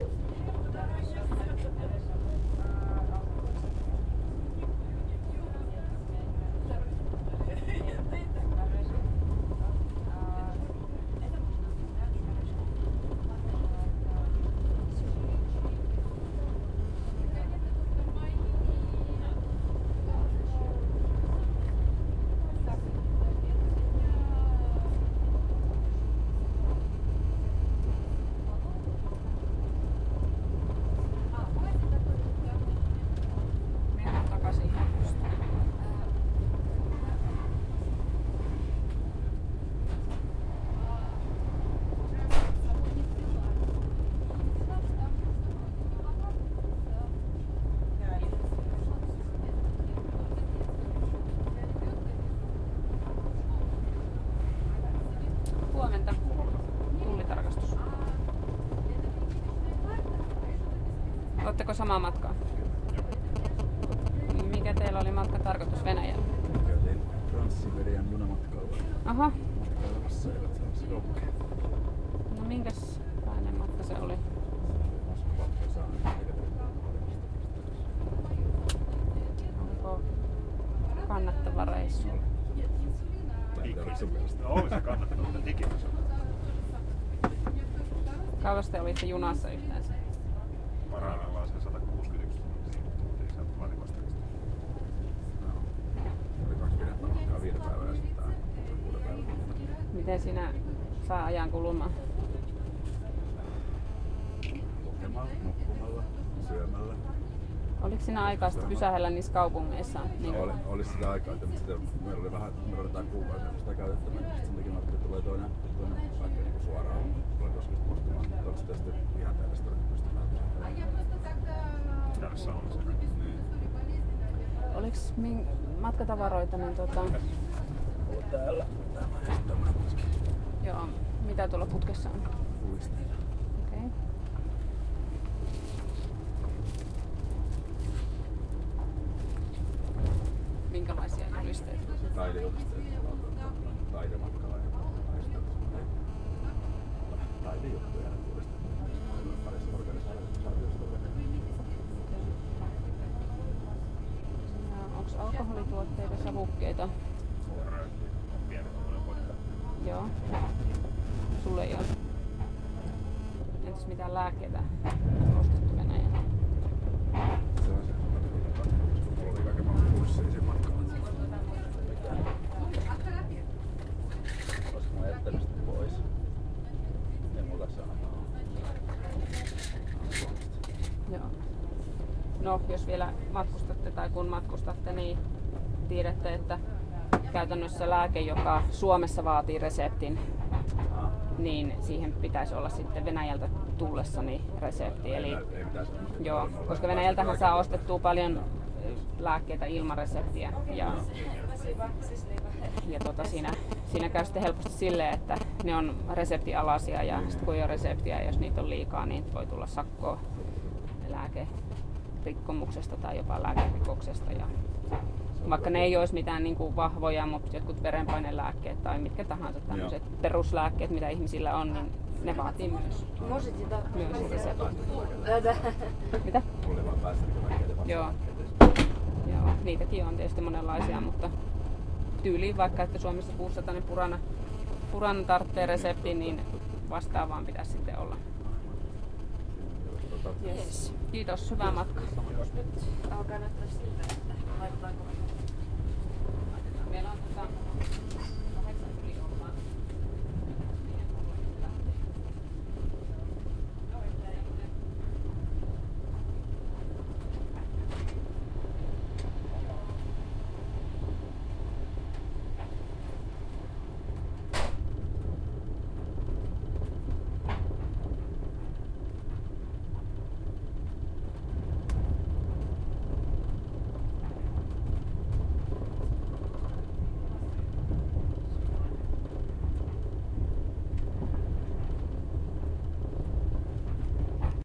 Finnish officials check documents in train at the Russian - Finland border. St.Petersburg - Helsinki
In cabin. Finnish officials check passports. Passport stamping sounds are heard. Recorded with Tascam DR-40.
border, field-recording, trans-siberian, finland, train, border-control, officer, stamp